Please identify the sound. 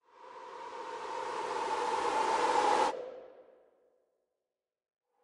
Whoosh Simple ER SFX 4
air, long, soft, swish, swoosh, swosh, transition, whoosh, woosh